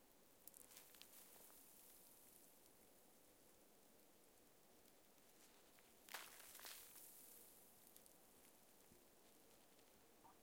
Sprinkling of snow on branches II
I recorded the sound of sprinkling of snow on branches in the forest.